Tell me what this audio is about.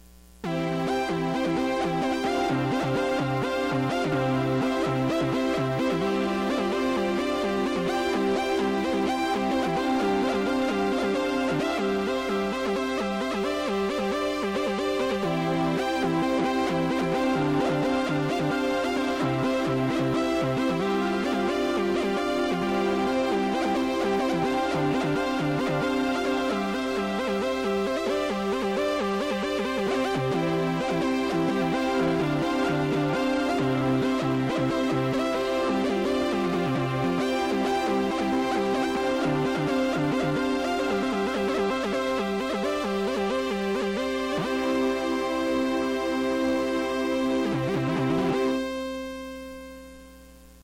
tune-in-c-major-strings-and-synth
Roughly hewn tune in C major with Yamaha Clavinova (strings) and synth (Arturia Minibrute). Recorded into Audacity. Created July 18, 2019.
analog, arturia, C, clavinova, digital, electronic, fun, funky, keys, loop, major, melody, minibrute, noisy, piano, ring, synth, tinkle, tune, yamaha